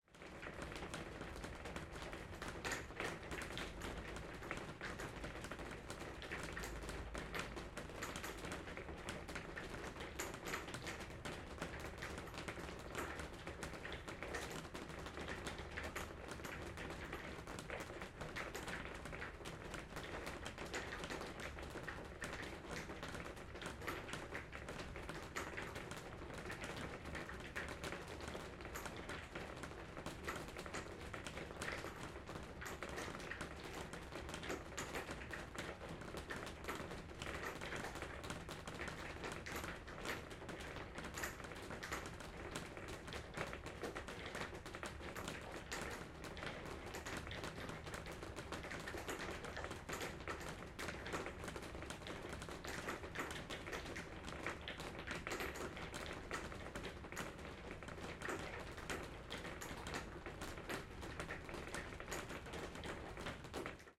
Raining on the Glass Window 20180905-4
Recording the rain inside my house.
Microphone: TLM103
Preamp: Focusrite Scarlett
storm, thunder, weather, nature, rain